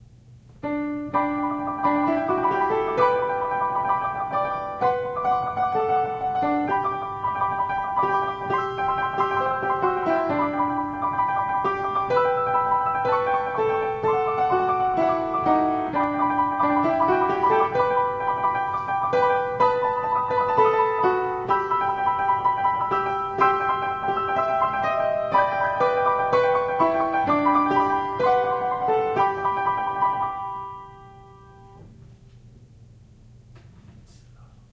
Silent Movie - Sam Fox - Fairy
Music from the Sam Fox Silent Movie Book. Played on a Hamilton Vertical - Recorded with a Sony ECM-99 stereo microphone to SonyMD (MZ-N707)
1920s, film, movie, piano